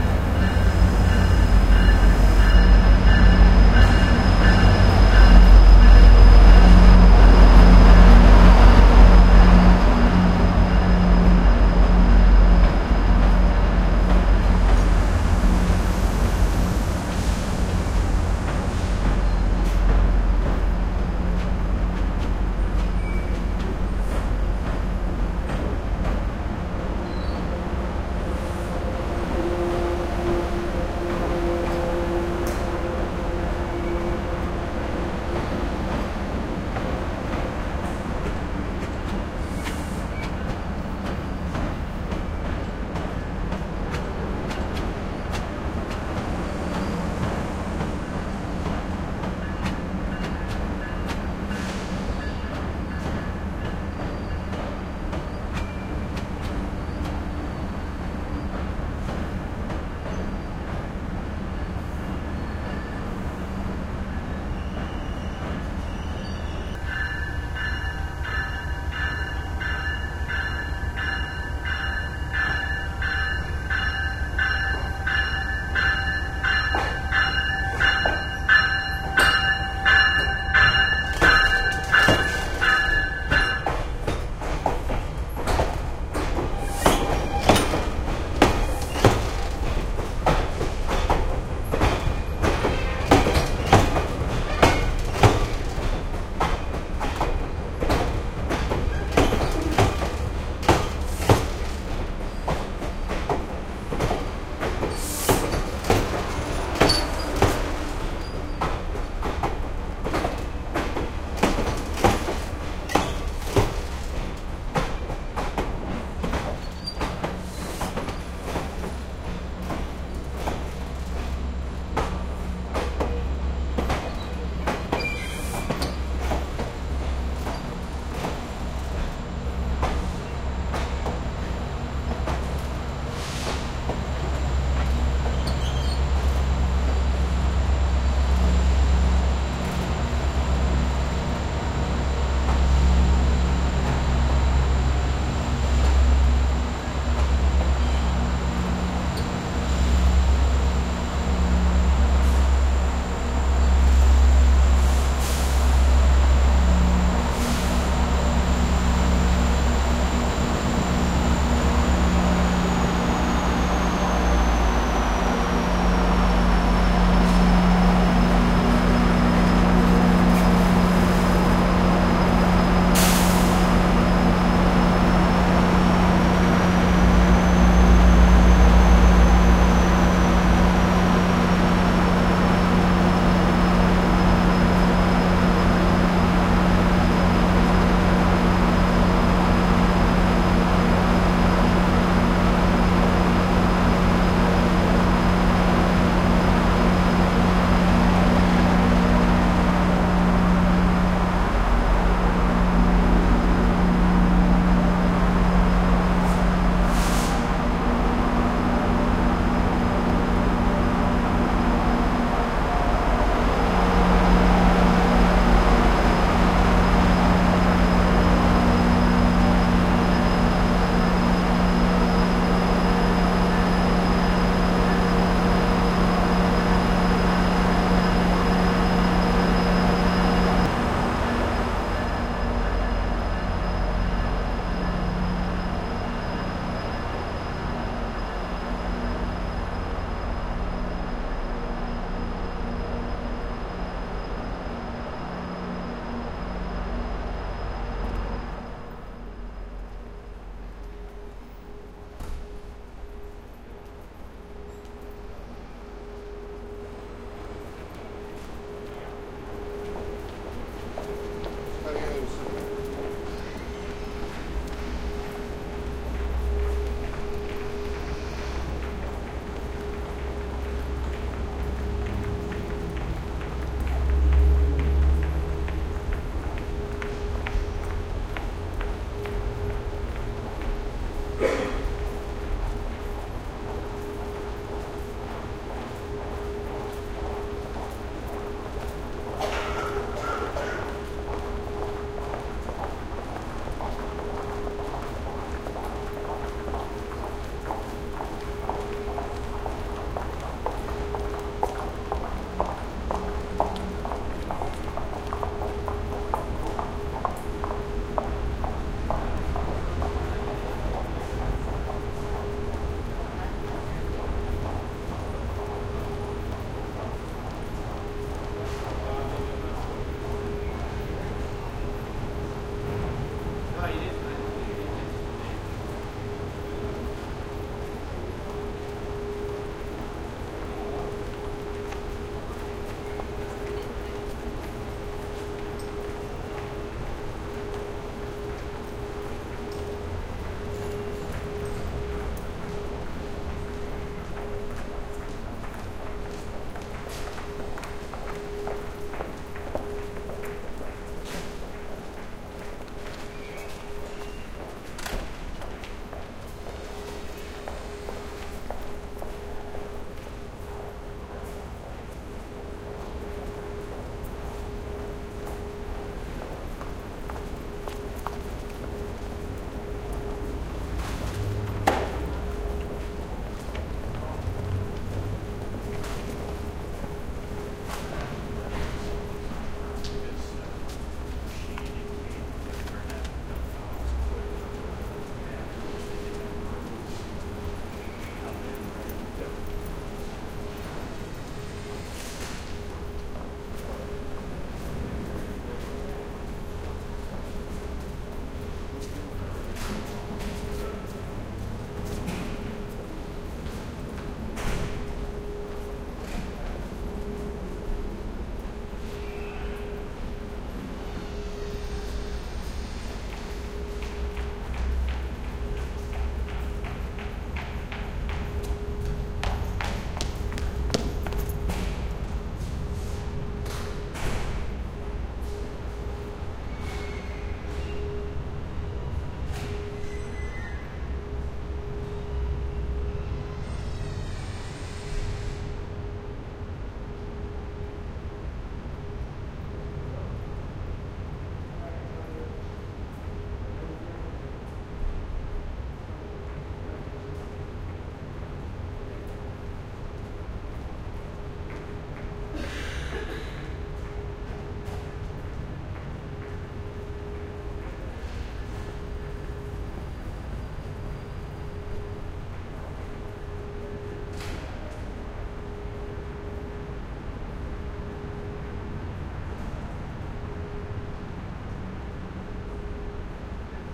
# GO Train passing Union Station Tracks Clacking